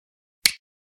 Clicksound
Recorded with a Sony MZ-R35